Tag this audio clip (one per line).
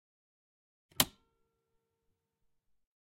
recorder
reel-to-reel
sound-effect
tape